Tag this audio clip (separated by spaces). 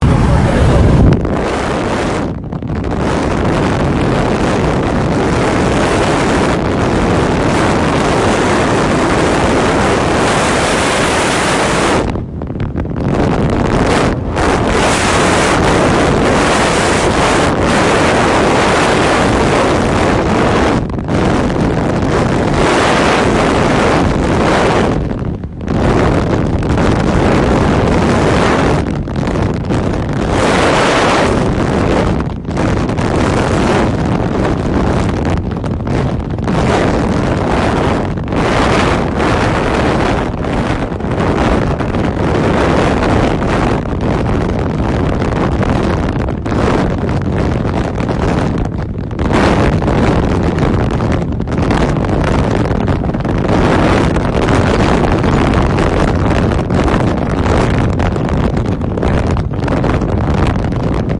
Car Cars Drive Highway Motorway Road Transport Travel Wind